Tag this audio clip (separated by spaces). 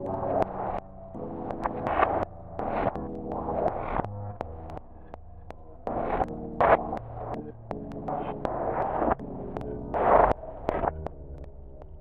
abstract broken digital electric freaky futuristic glitch machine mechanical noise sound-design strange